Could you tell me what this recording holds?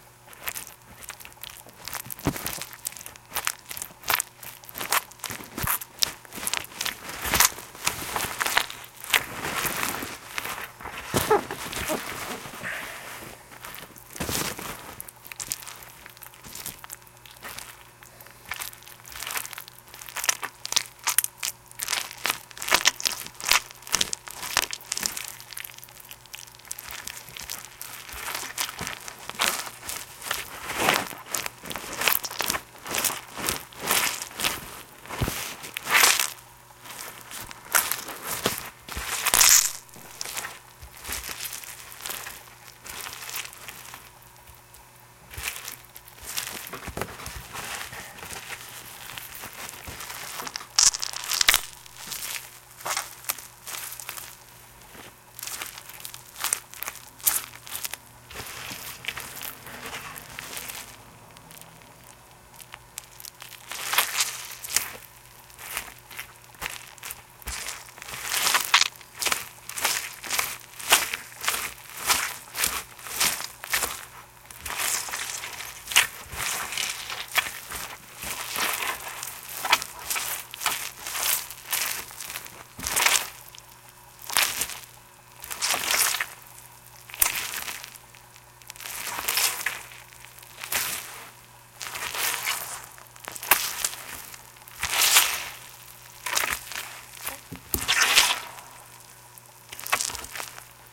I recorded this sound back in 2007. This was made by smashing a few bananas onto a desk.